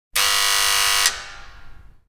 Sound of door buzzer, only one time and short